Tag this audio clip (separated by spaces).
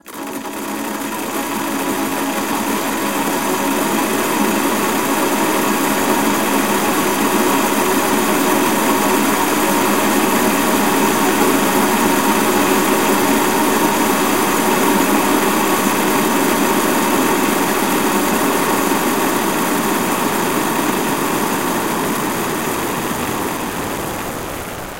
background processed choir pad granular